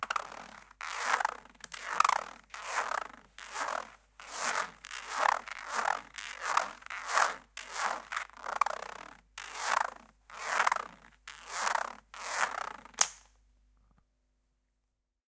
Elastic Zip sound ST
Weird sound made with a rubber band, sounds much alike a zip...
elastic, rubber-band